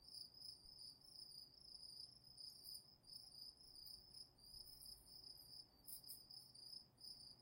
Night 3 (Loop)
Crickets chirping in the night.
ambianca, ambience, ambient, chirp, cricket, crickets, field-recording, insects, nature, night, nighttime, outdoors, outside